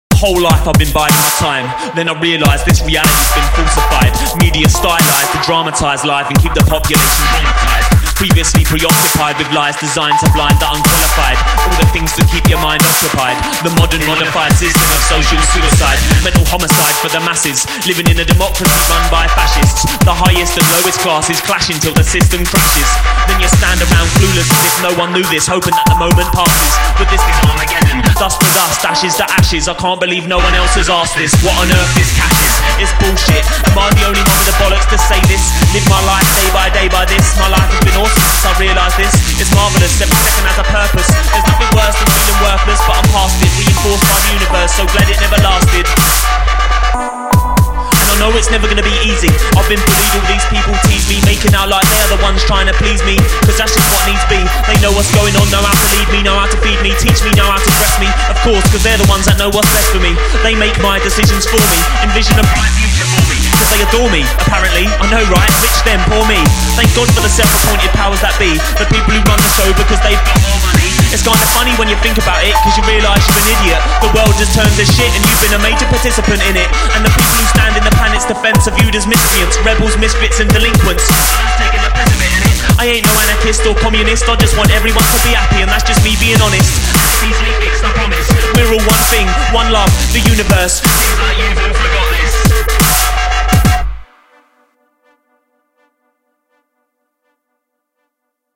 Dust to Dust Rap Example
I have all the rights to this track, the vocal is my best bud Treva and he's cool with you doing whatever you like with this clip, i uploaded it purely as an example of arcade rap.
clip, free, rap, hip-hop, hats, urban, download, club, kick, bass, arcade, compression, limiters, ride, perc, vocal, grit, fruity-loops, massive, drums, synth, snare, 2013